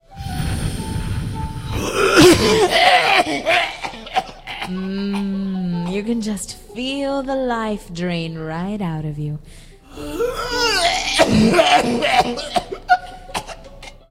tobacco cough
From an audio play - this was a faux commercial about 'lingering death cigarettes'
Nasty cough followed by ---
Female voice: "You can just feel the life drain right out of you"
followed by another nasty cough.
speech, cigarettes, cough, female, voice